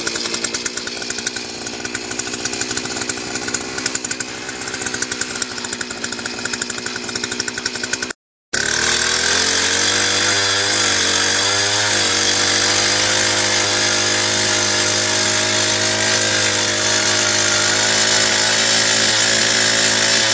Ice Drill
Drilling through ice.
chainsaw, saw, drill, game, engine, ice, motor, drilling, harvest, resource, arctic